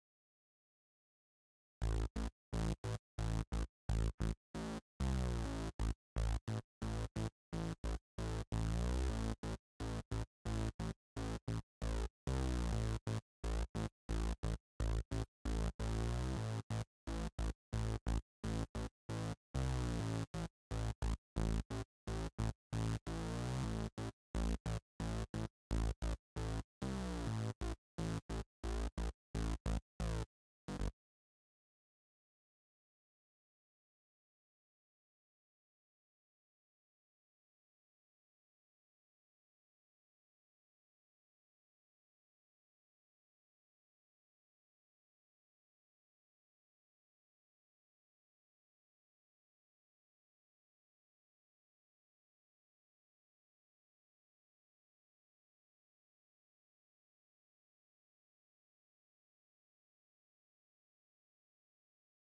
Saw Slide
Note: A
BPM: 132
Breakbeat, Dry, Triplets